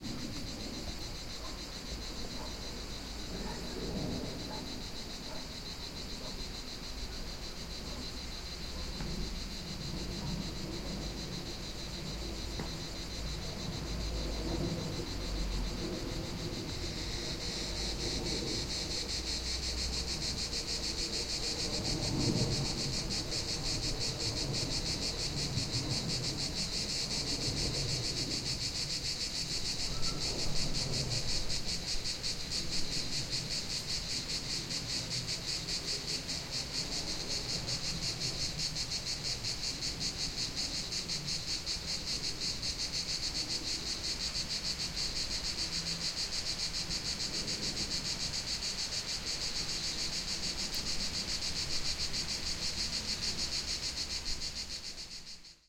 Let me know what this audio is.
Crickets day Grills Dia
cicadas, crickets, day, field-recording, insects, nature, summer